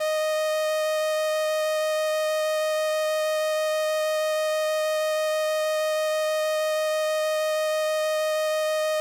Transistor Organ Violin - D#5
Sample of an old combo organ set to its "Violin" setting.
Recorded with a DI-Box and a RME Babyface using Cubase.
Have fun!